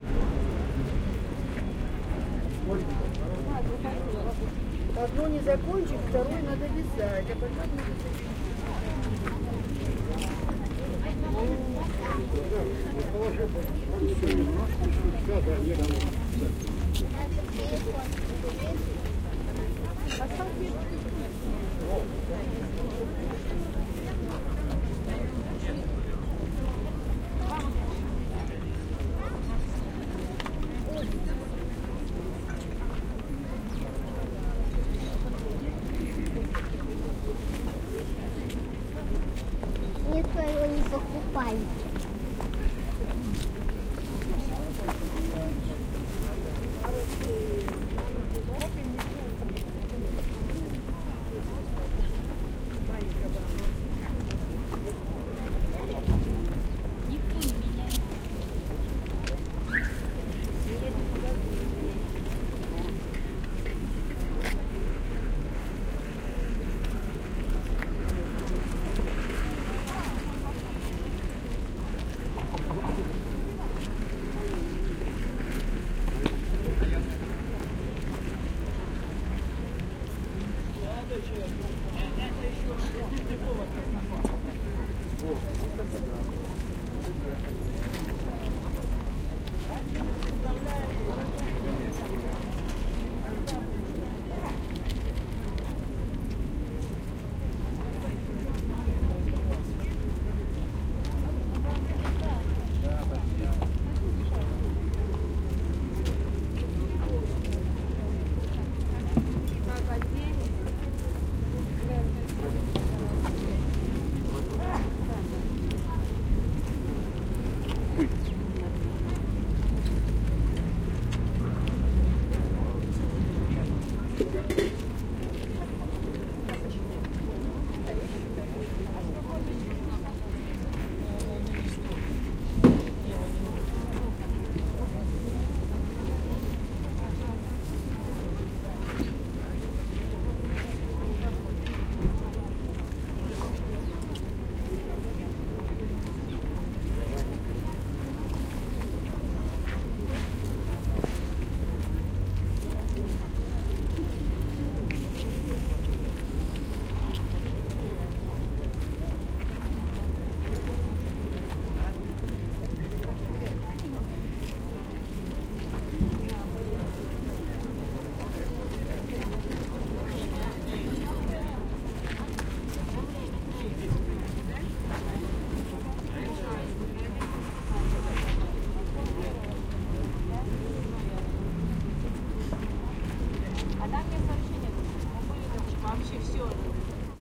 ambience, flee market, between rows
ambience, field-recording, Russia, St-Peterburg